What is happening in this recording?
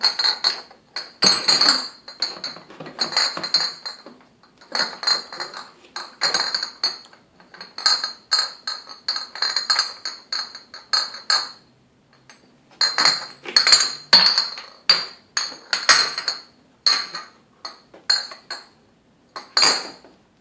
Beer bottles clinking inside a box
Beer bottles clinking around inside the box they came in